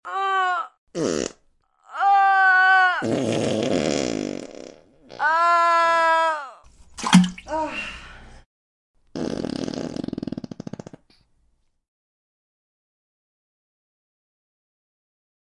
Helga ten Dorp drops a deuce. Made as a gag for a cast party.